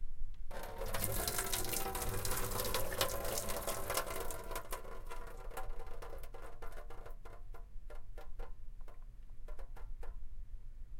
Pouring into the sink
Water being poured from an electric water boiler into the kitchen sink.
Water, sink, pouring